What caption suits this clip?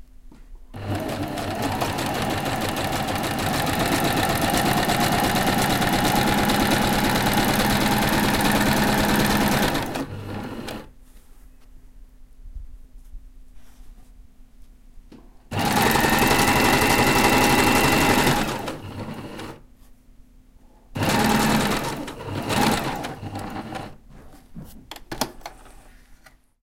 Close record of sewing machine